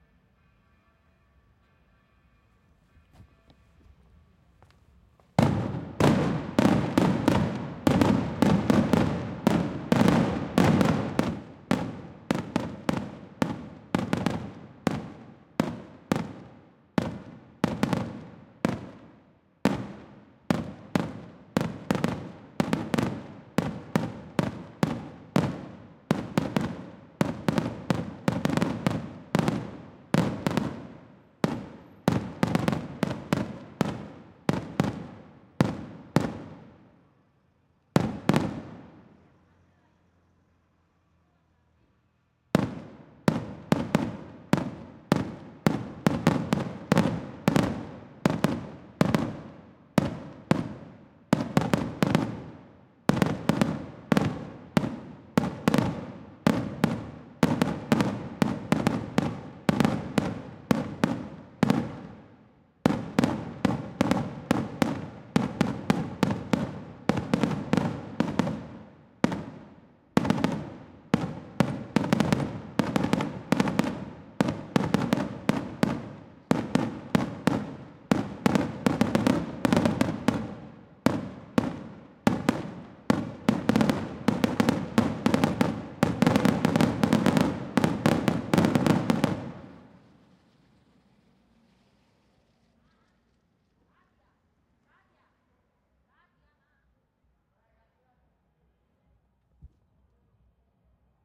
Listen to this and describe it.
Fireworks by a fiesta in la Instinción, Almería
boom, explosion, fiesta, fire-crackers, firecrackers, fire-works, fireworks, rocket, rockets, village